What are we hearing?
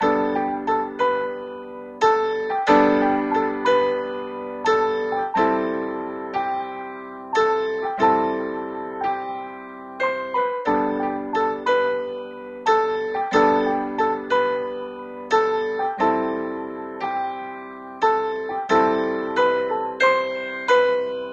Lofi Piano loop Gmaj 90 BPM
90, samples, lofi, chill, packs, music, jazz, pianos, nostalgic, Gmaj, piano, sound, pack, loop, relaxing, lo-fi, Major, bpm, hiphop, sample, loops, G, melody